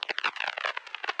Air FX Vinyl
Aircraft, Airplane, Airshow, British, Cyclone, Fighter, Flight, Flyby, FX, Imaging, Merlin, Mustang, Packard, Radial, Restored, Rolls-Royce, V12, Vintage, Warbird, Wright, WWII